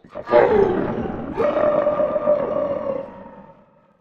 Simple recording directly served as the microphone of the portable one on Logic and subsequently add efx